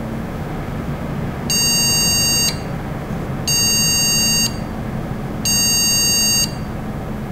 Mainboard Error Code
Recorded using a Zoom H2.